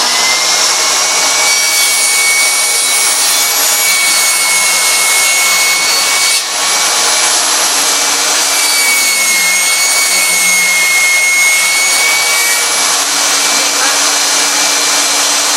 Circular Saw 2
Sound of a circular saw in operation.
electric, cut, mechanical, saw, woodwork, circular, machinery, machine